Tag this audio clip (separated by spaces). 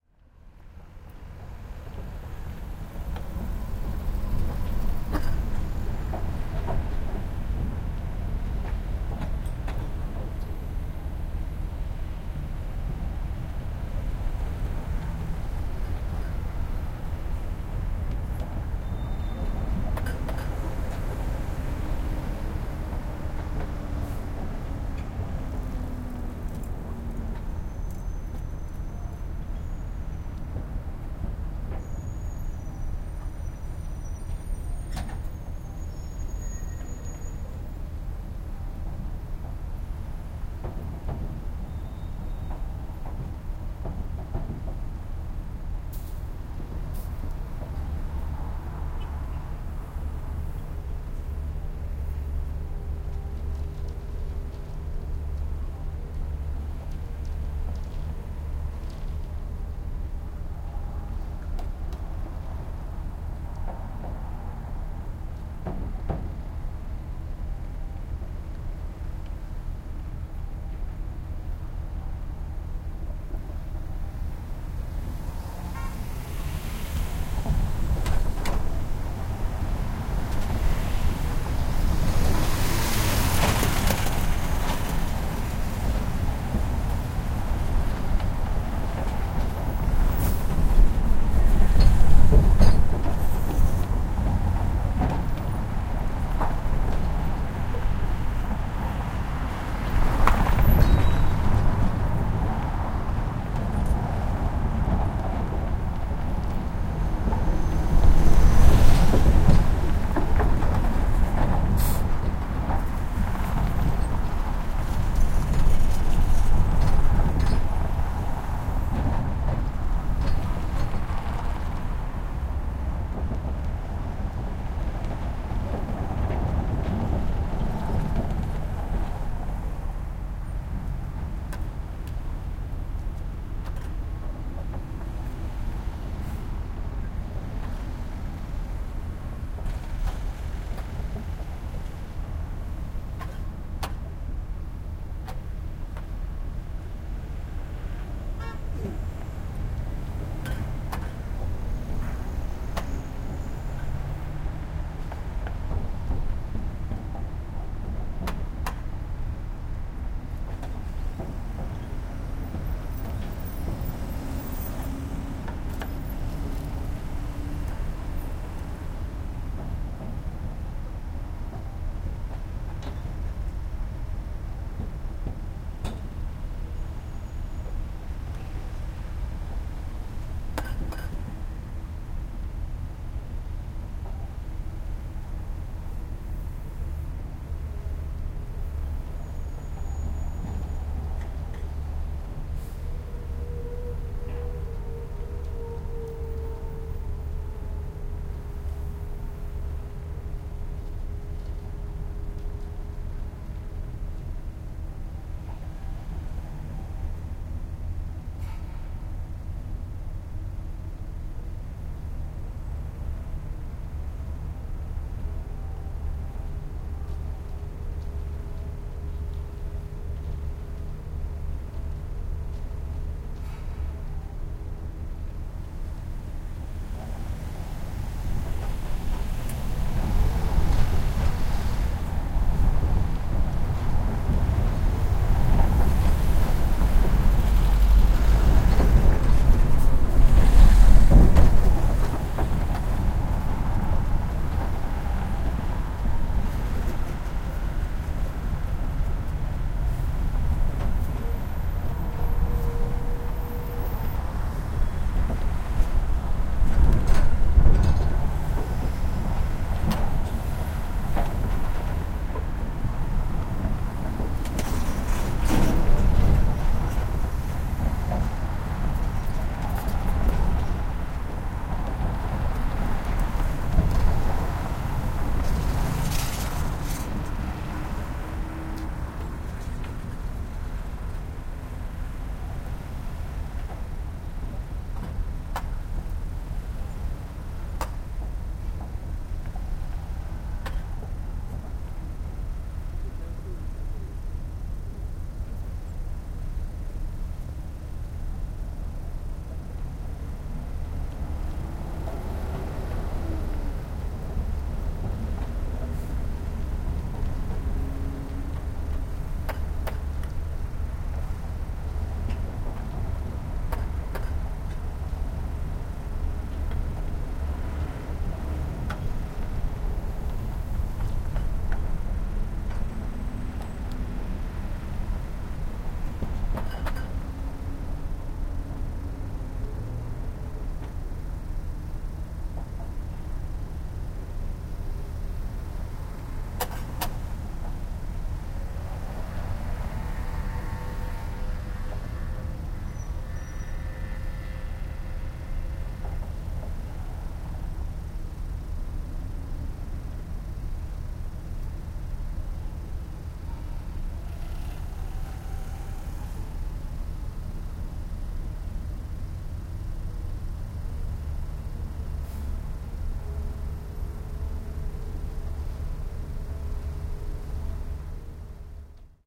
construction field-recording korea seoul traffic